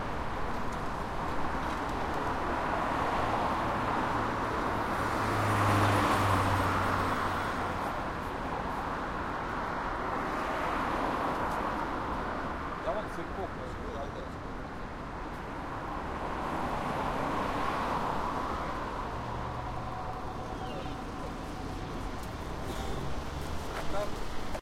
ambience, railway station, square, traffic, city, Voronezh
Square near the main railway terminal (Voronezh)
city, people, street